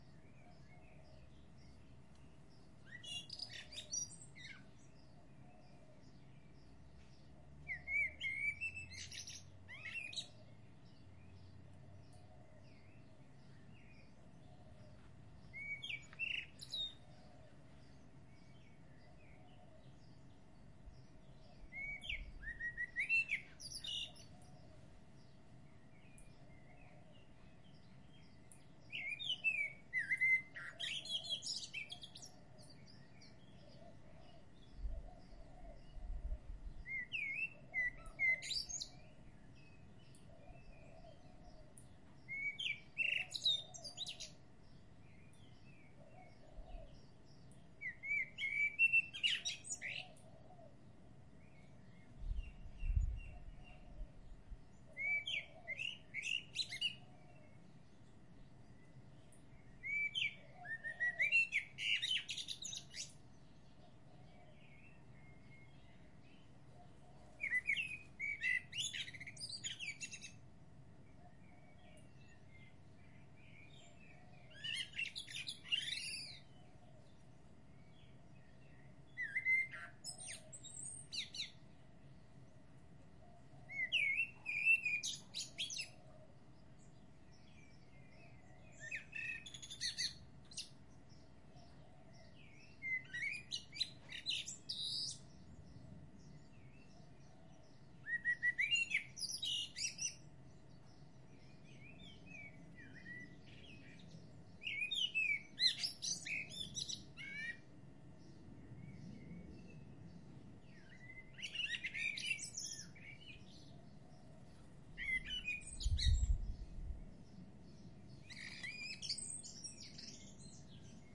Very clear birdsong
Early morning birds recorded in Brisbane, Australia on a Zoom H4n Pro
sing, brisbane, birdsong, song, morning, bird, field-recording, nature, chirp, australia, quiet, dawn, birds